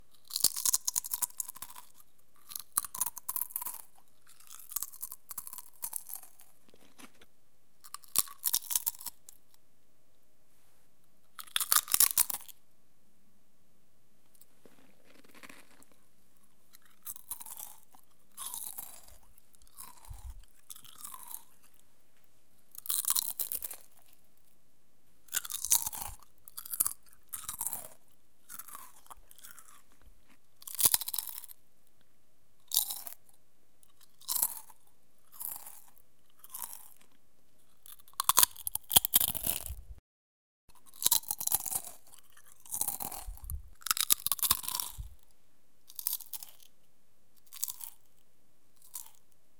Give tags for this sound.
bite
chips
crisp
crunch
eat
effects
foley
h4n
mouth
potatoe
sfx
tomlija
zoom

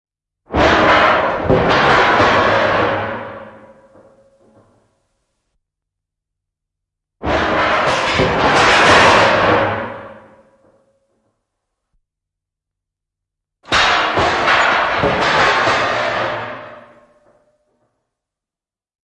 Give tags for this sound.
Fall
Pudota
Drop
Field-Recording
Yleisradio
Kolahdus
Suomi
Finland
Soundfx

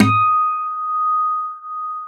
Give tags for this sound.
multisample
1-shot
guitar
acoustic
velocity

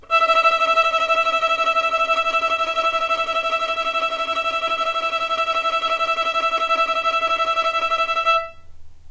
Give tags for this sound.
violin
tremolo

